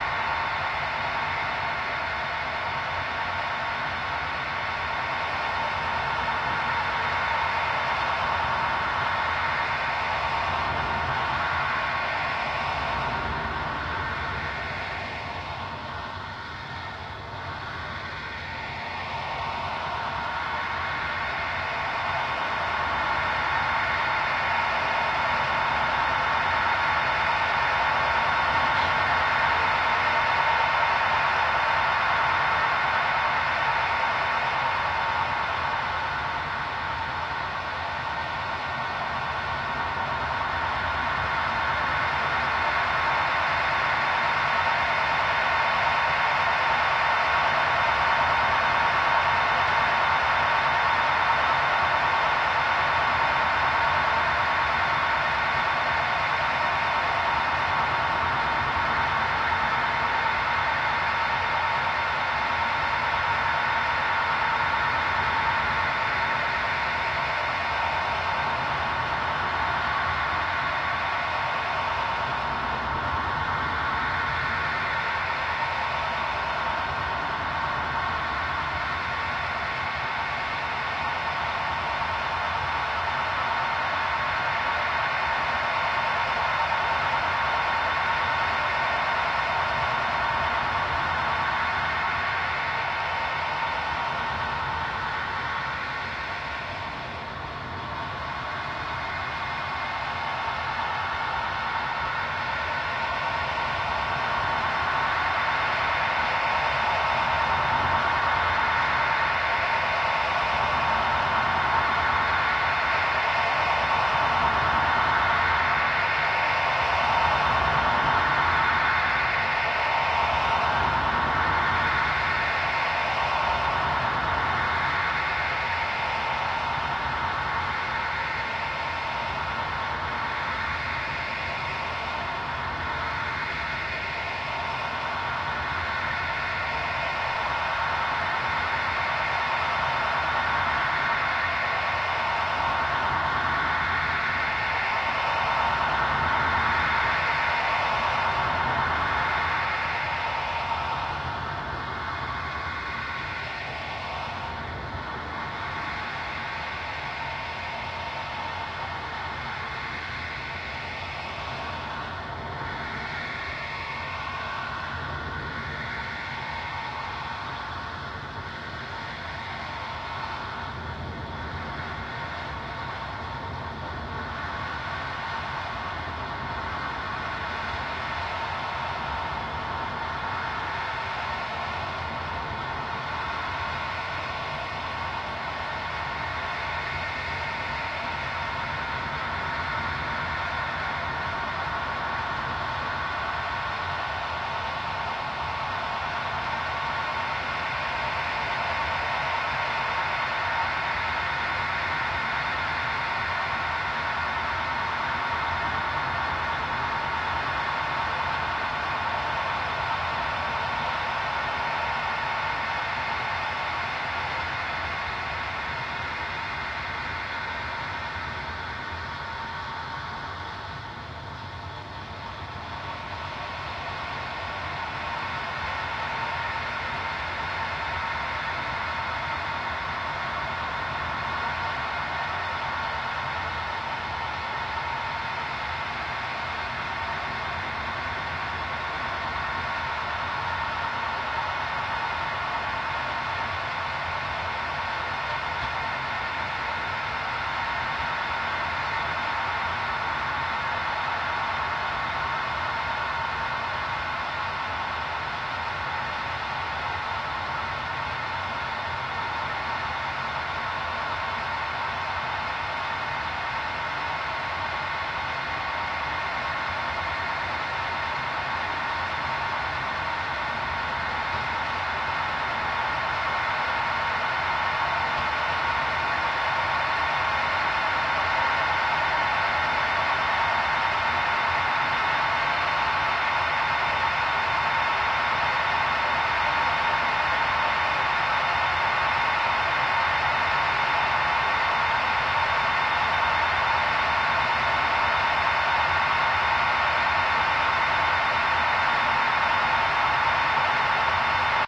tube radio shortwave longwave noise interference hiss faint signal
A faint radio signal with a bit of hiss, captured on an old tube radio with a long antenna.
tube, radio, noise, interference, faint, signal, hiss, longwave, shortwave